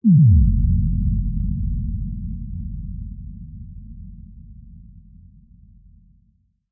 The sound of being submerged. Automated test oscillator with reverb added.
Bass, Bomb, Dive, Submerge, Underwater, Water